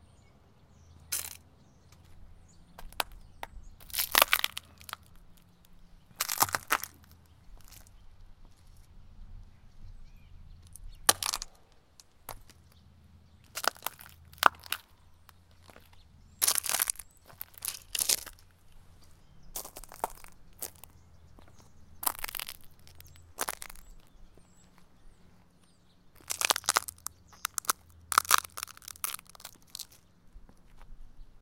cracking walnuts underfoot
Stepping on walnuts. Tascam dr100 mkiii.
crack, cracking, crunch, crunchy, stepping, walnuts